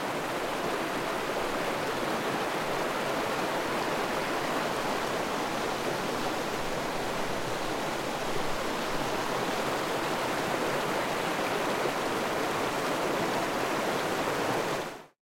Mountain River
babbling, flowing, river, stream, water